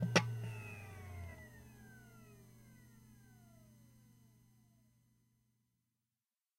hard drive shut down 01
This is an external hard drive shutting down.
down, drive, electric, hard, industrial, shut